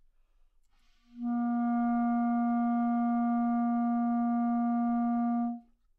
Part of the Good-sounds dataset of monophonic instrumental sounds.
instrument::clarinet
note::Asharp
octave::3
midi note::46
good-sounds-id::682